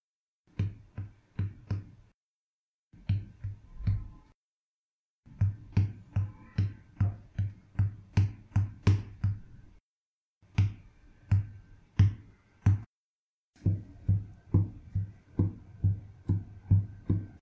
dh footsteps collection
Tapped my finger against my desk (it's wood) to make this sound.